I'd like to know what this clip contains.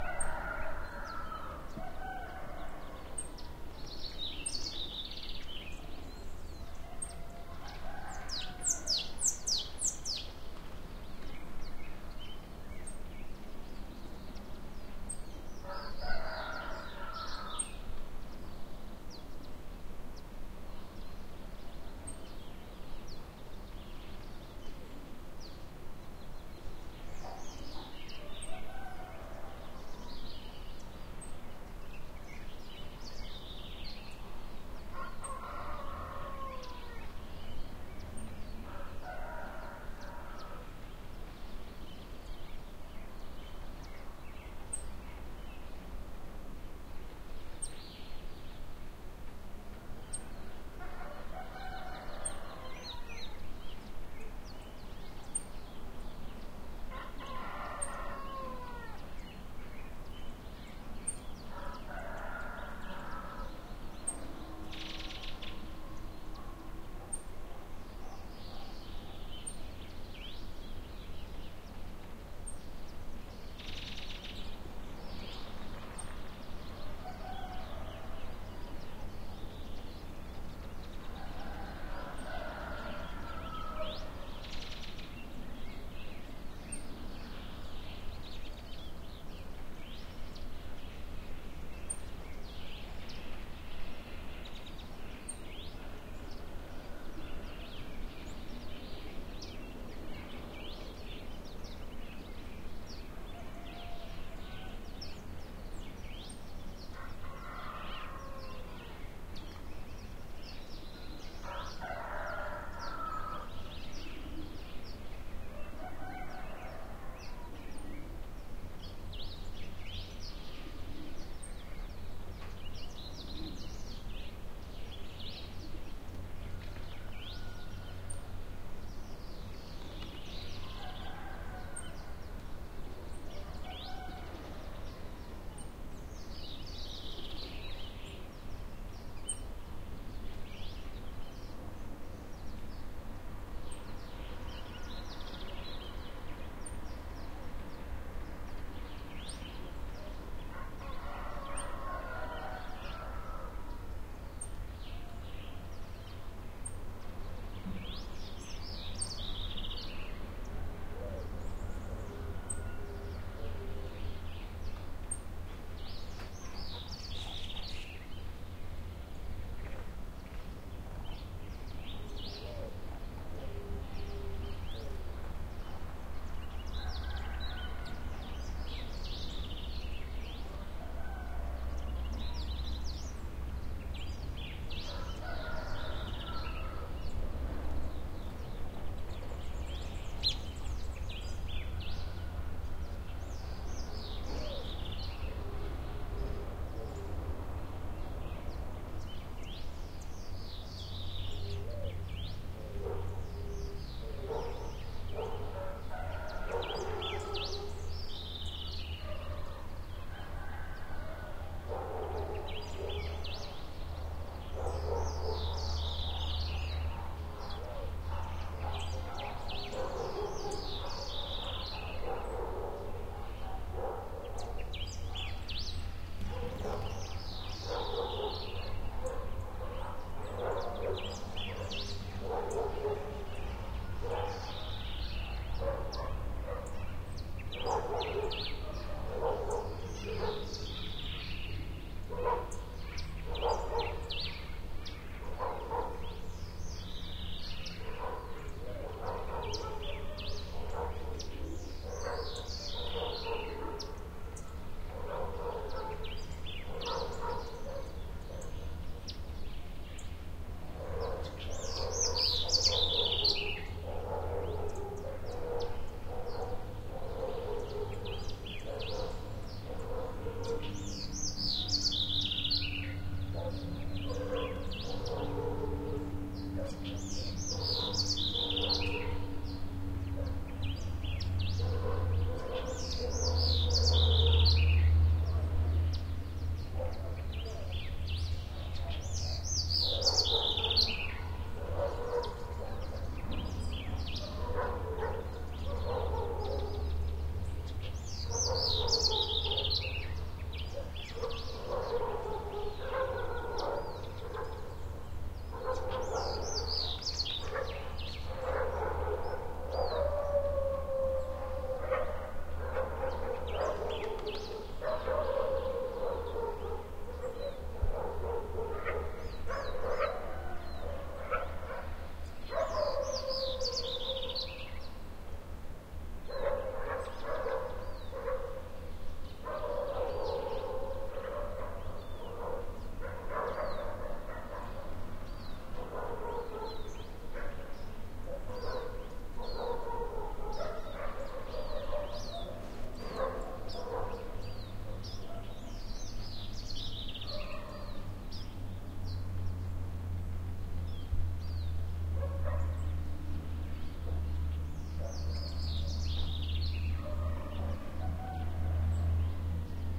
country rural village in mountains birds and distant rooster barking dogs and light traffic Peru, South America
America, country, dogs, mountain, Peru, village